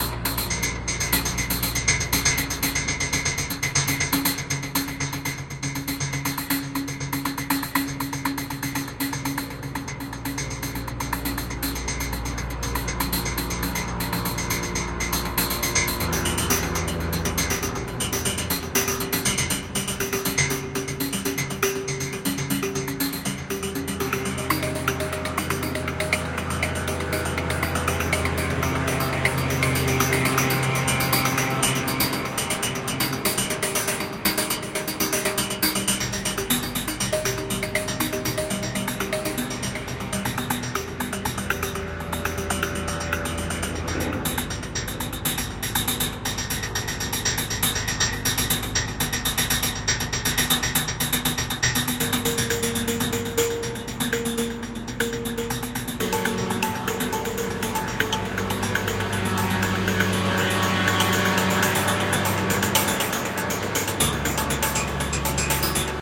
Street action - dark thriller movie drone background
Street, creepy, cinematic, terror, rythm, film, music, drama, action, drone, drum, dramatic, thriller, background, dark, suspense, movie, thrill, scary